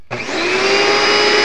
vacuum startup
The sound of a Royal "DirtDevil" Model 085360 vacuum cleaner as it is turned on.
Recorded directly into an AC'97 sound card with a generic microphone.
noise, vacuum, household